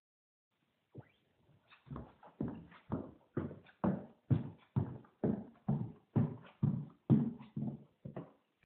Walking Hard Floor
Walking on a hard wood floor